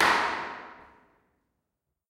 One of a series of sounds recorded in the observatory on the isle of Erraid
field-recording, hit